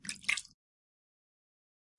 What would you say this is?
Small Splash 001
aqua, aquatic, bloop, blop, crash, Drip, Dripping, Game, Lake, marine, Movie, pour, pouring, River, Run, Running, Sea, Slap, Splash, Water, wave, Wet